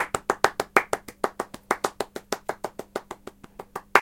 Hands Clapping
waking, applause, field-recording, up, patriotic, someone